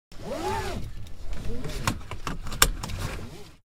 putting on seatbelt
seatbelt being put on
putting, seatbelt